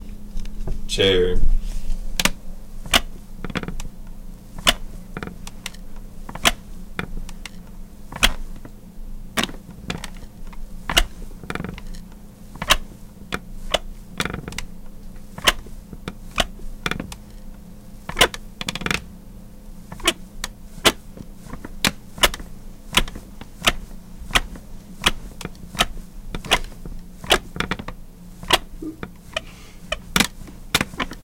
Sound 3 rocking chair
chair, creaky, rocking